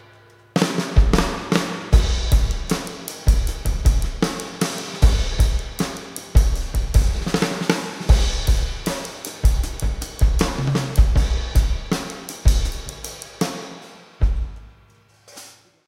Nice Drums
Just another test recording of my drumset.